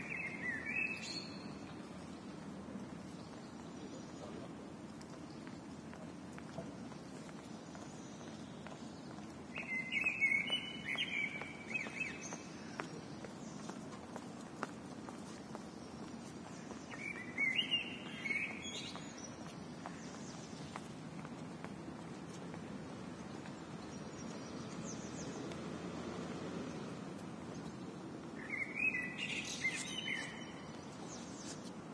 Blackbird on campus
A single blackbird singing in a tree on the Newcastle University campus, early summer 2010.
You can also hear the footsteps of people walking by and the murmur of traffic in the distance.
It was a weirdly quiet moment given that it was mid morning in the city centre.
blackbird, city, footsteps, summer, traffic